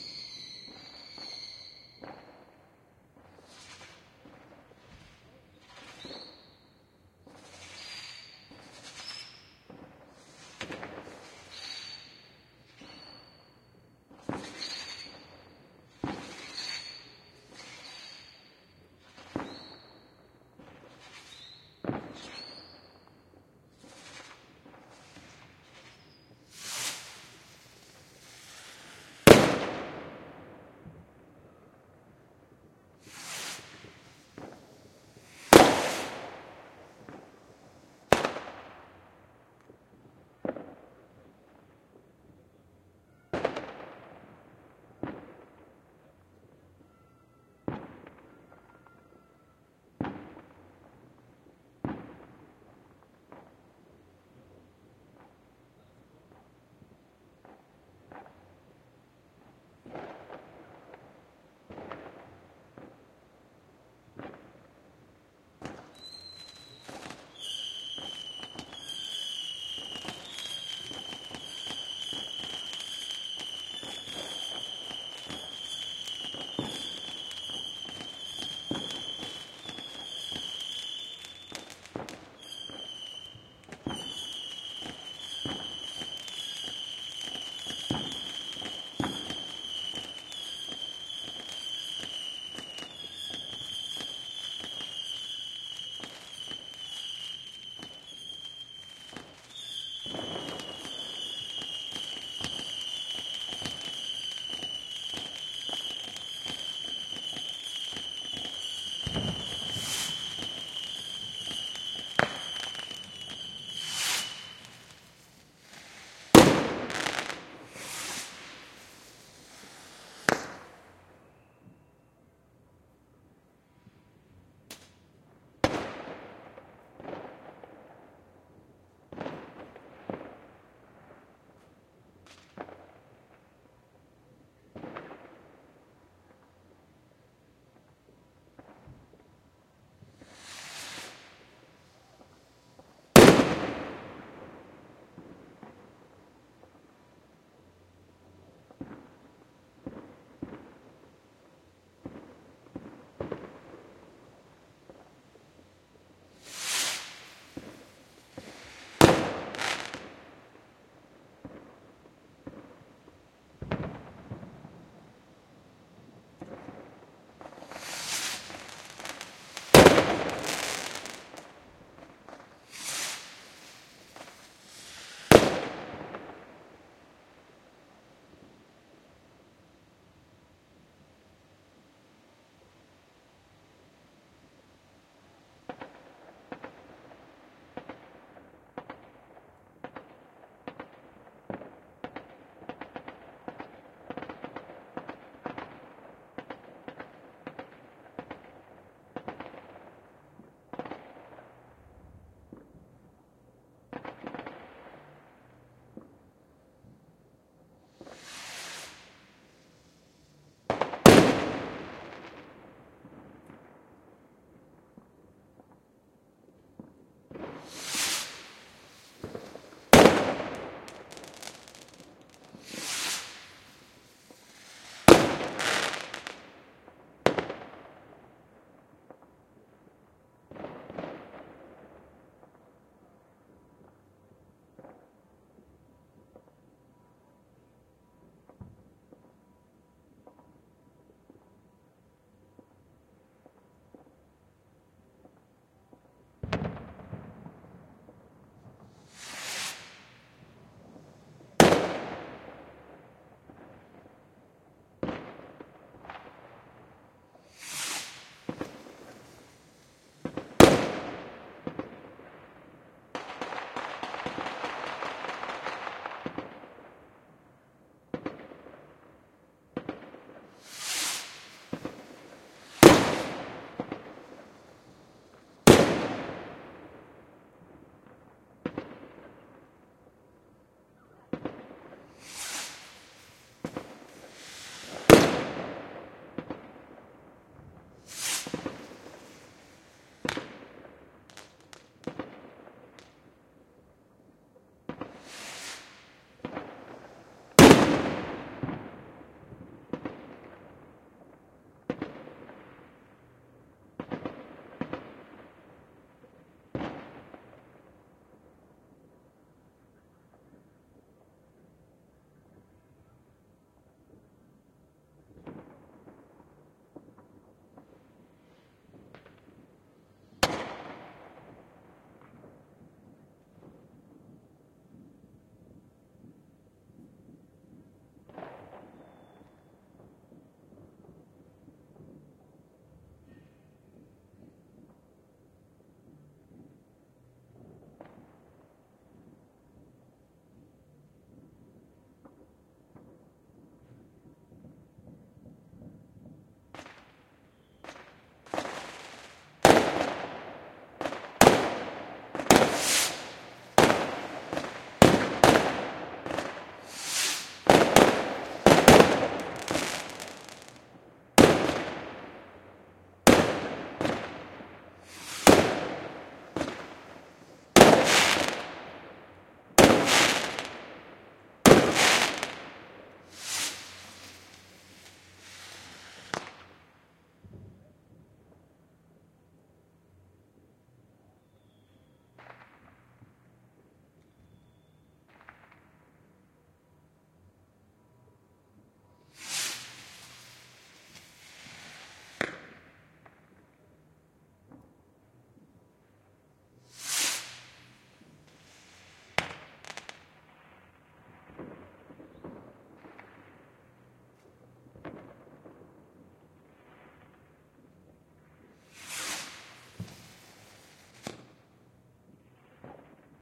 New Year Eve Fireworks 2012/2013 in Herzogenbuchsee (Switzerland). Recorded with my Zoom H2n in MS!
2013, Firework, H2n, new, year, Zoom